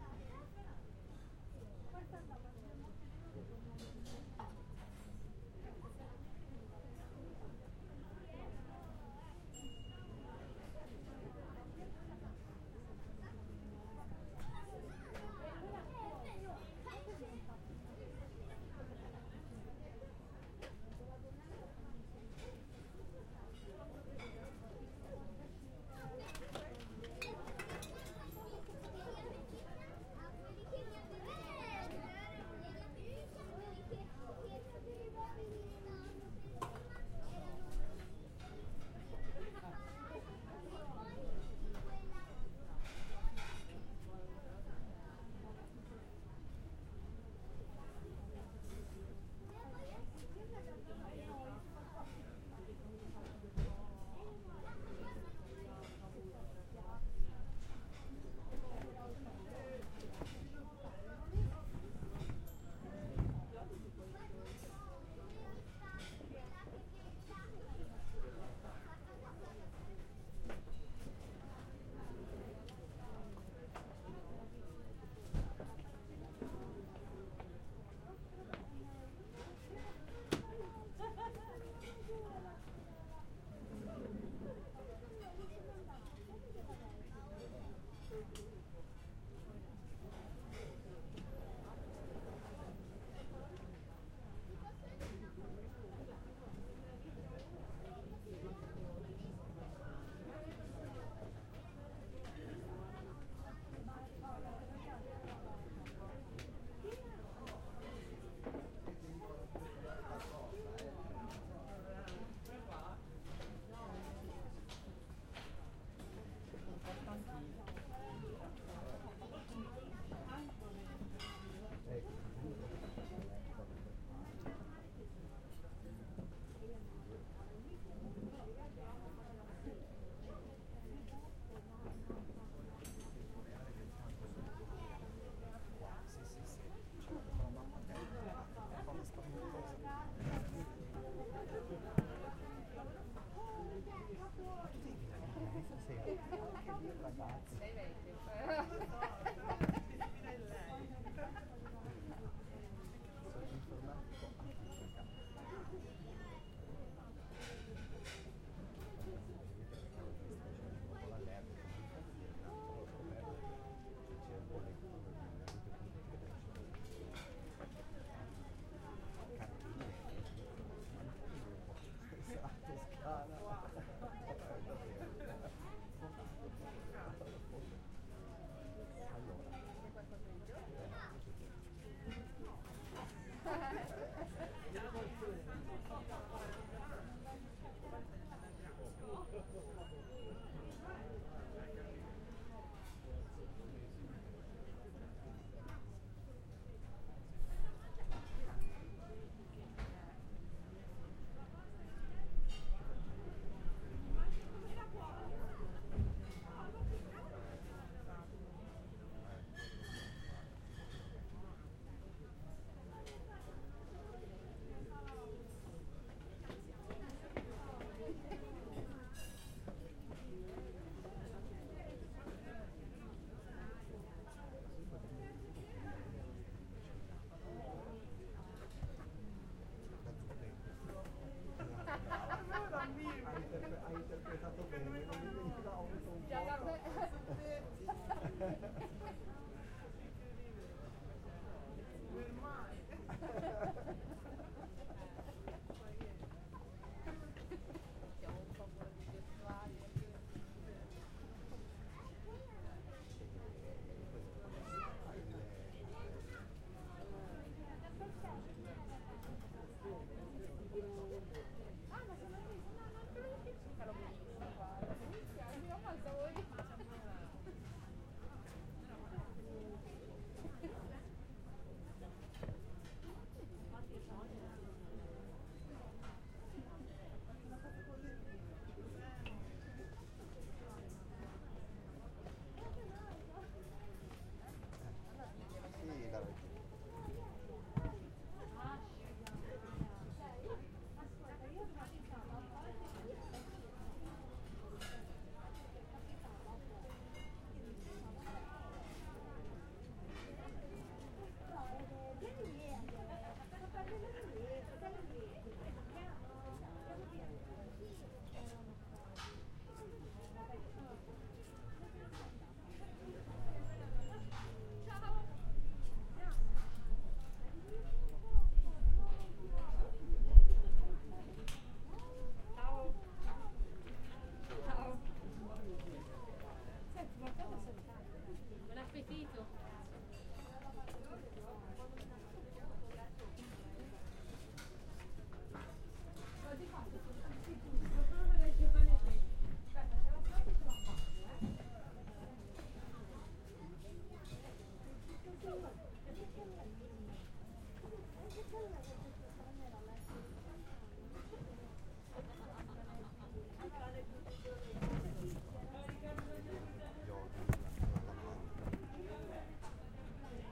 recorded not so far from restaurant cuisine hearable sounds of plates and bell calling waiters for dish ready, people chatting, laughing at Baia del Rogiolo, Livorno, Italy